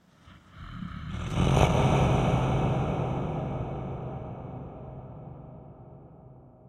Some monster sound.